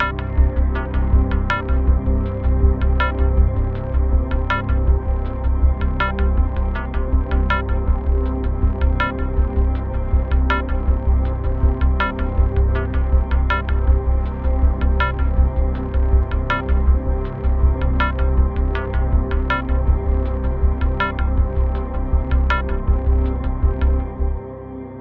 padloop80bpm8bars11
padloop experiment c 80 bpm
atmosphere, ambient, drone, soundscape, deep